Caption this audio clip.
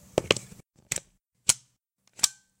A recording of me unplugging a phone charger, various times.
unplug, unplugging, cable, plug, connect, usb